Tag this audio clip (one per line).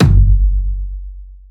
bassdrum
bottle
designed
effected
kick
kick-drum
kickdrum
one-shot
oneshot
processed